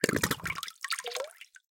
suck drip 1
various sounds made using a short hose and a plastic box full of h2o.
blub, bubble, bubbles, bubbling, drip, gurgle, liquid, suck, sucking, water, wet